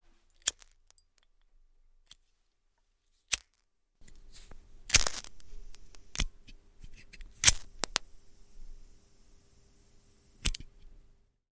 cigarette lighter
fire, smoking